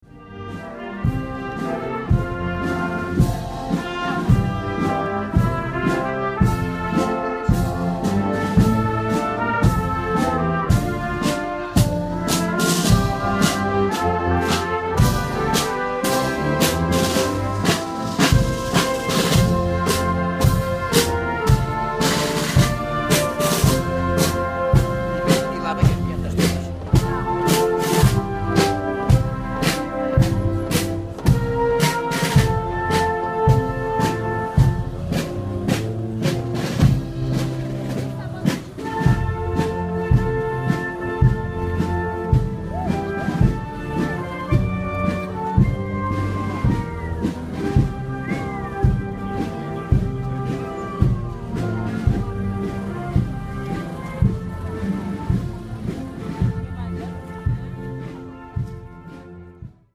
August 10th 2014, Santa Marta de Portuzelo (Meadela), Portugal